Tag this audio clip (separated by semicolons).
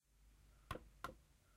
poner; manos